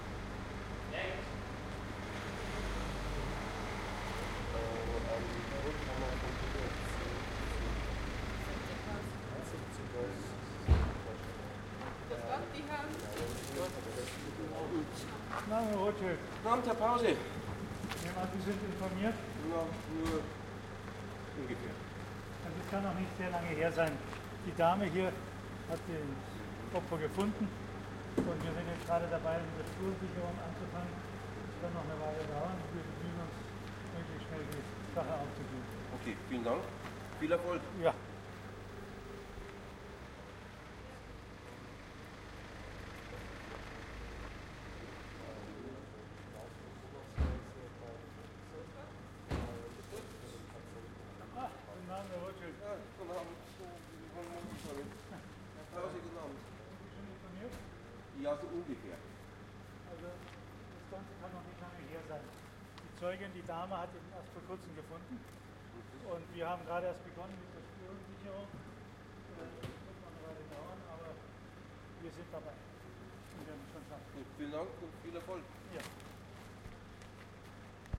I did not record this ambience sound! WTF
I've left my Zoom H1 recorder at a friends place for quite some while until i picked it up today. Accompanied by a few tracks that make sense to us i also found this record, which none of us (not me nor my friend) recognizes as their record. There were two files (which i recognize as takes), so it must be a part of a fictional flick. I've pulled them together here.
Here's what i belief it is:
Ambience of a crime scene with cars approaching, motors running, people alighting and then talking. Seems like the police or other authorities just discovered a corpse.